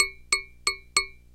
Tapping a Kitchen Aid dough hook with a pencil four times. Recorded with a contact mic taped to the base.